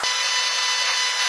scamgran base
Some incidental lo-fi noise I noticed in a recording, boosted and filtered. Thought it had nice harmonics.